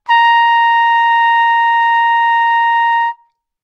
overall quality of single note - trumpet - A#5
Part of the Good-sounds dataset of monophonic instrumental sounds.
instrument::trumpet
note::Asharp
octave::5
midi note::70
tuning reference::440
good-sounds-id::1049
Asharp5, good-sounds, multisample, neumann-U87, single-note, trumpet